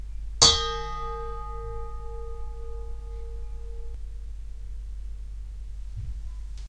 A recording of a simple metal kitchen bowl, hit with a wooden spoon. It sounds just a little bit like a bell.
Recorded with a TSM PR1 portable digital recorder, with external stereo microphones. Edited in Audacity 1.3.5-beta
bowl, bell, kitchen, dong, wooden-spoon